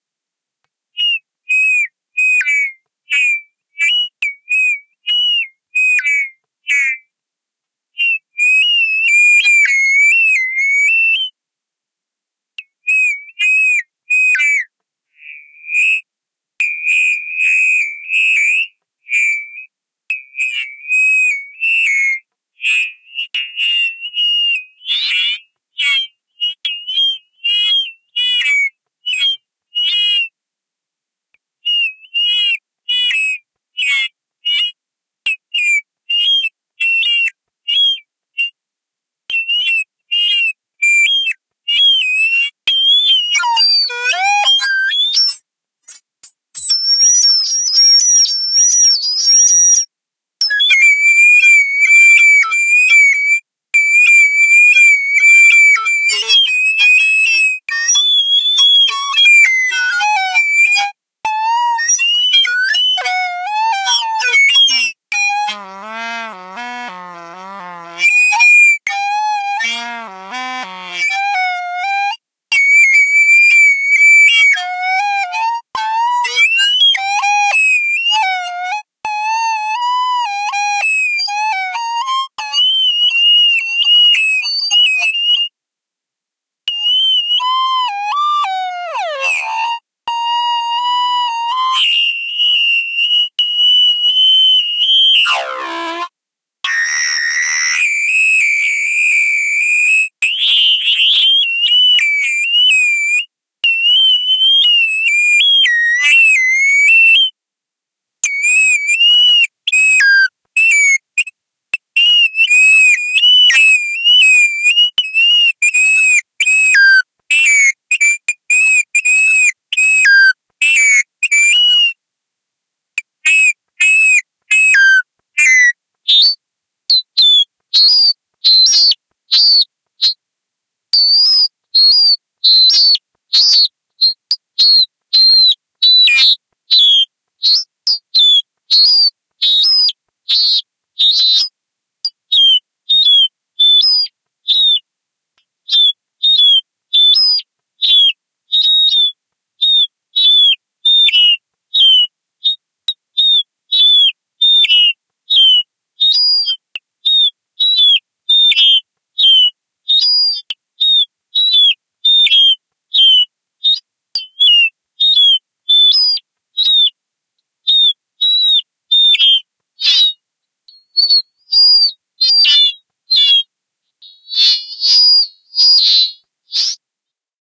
Third Bird Synth 03
Alien birds warbling.